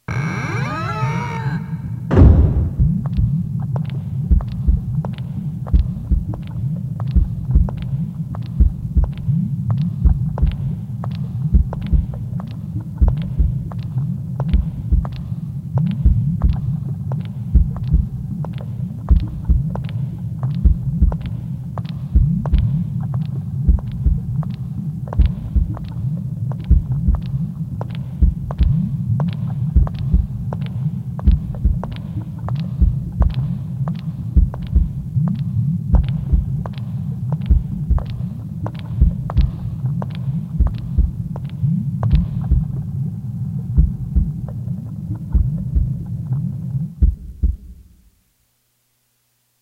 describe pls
Walking a Heartbeat Underwater
A door squeaks and slams shut. Footsteps and a heartbeat are heard walking down a hall in a structure that seems to be underwater. Listen for yourself and let your imagination come to your own conclusion.
Recorded with a Yamaha keyboard using Audacity and a little creativity.
No acknowledgement necessary but most appreciated.
Thank you & have fun!
bubbles, door-slam, medical, slam, submarine, under-water, underwater